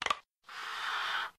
A checkpoint being created. Used in POLAR.